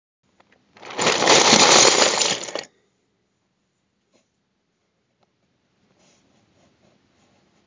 Rubble and debris shifting during the aftermath of an explosion. Recorded with a phone and a box of legos.